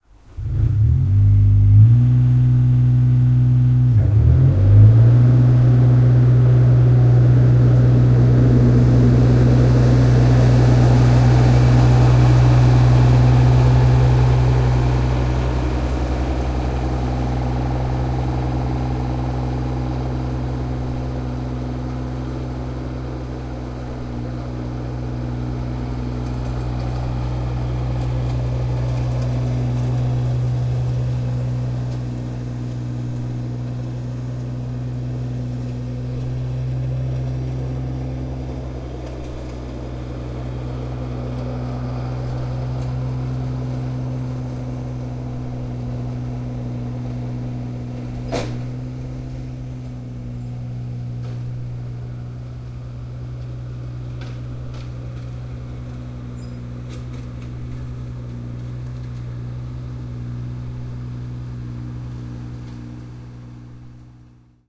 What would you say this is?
FP Inside A Drainage Pipe
Recorded inside a plastic drainage pipe with a home-made binaural microphone.
binaural, drain, dripping, sewer, water